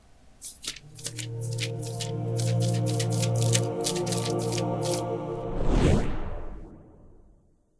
A cleric using the Knowledge of the Ages ability